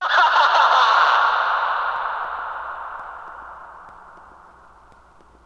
Oh What A Joyous Day!
Me laughing maniacally :D Muahahaha!